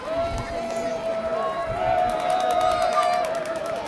Crowd cheering at parade
Generic crowd cheering at a St. Patrick's Day parade
ambient, parade, crowd, chat, field-recording, people